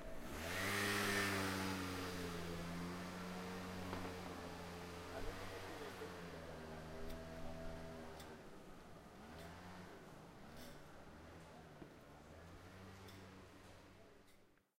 One of the most common modes of transport in the streets of genova
engine, transport, scooter, moped, motor